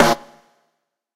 SNARE GOLDY CLAR
bass, dnb, hits